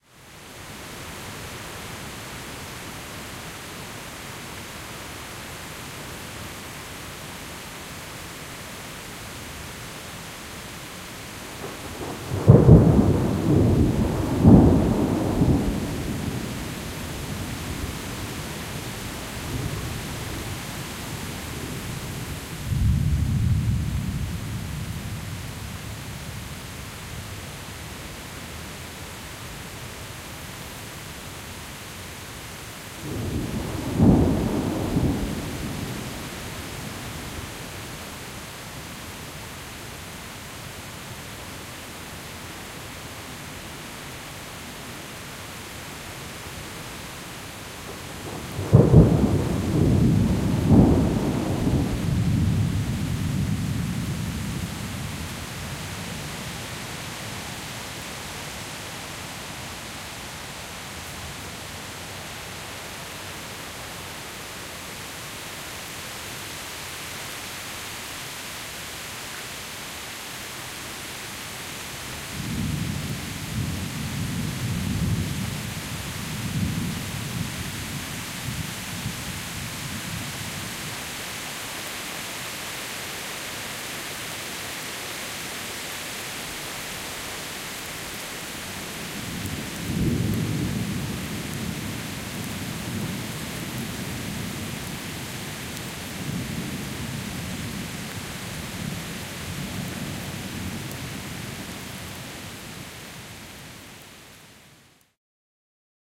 Rain And Thunder In The Forest
Recorded during a heavy thunderstorm near Hamburg